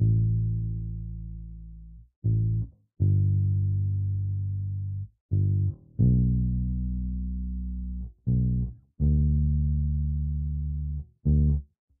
Dark loops 050 bass wet version 1 80 bpm
loop, 80, bpm, loops, bass, piano, dark, 80bpm